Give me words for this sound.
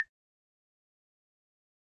percussion sound in Dminor scale,...
itz my first try to contribute, hope itz alright :)
instrument, phone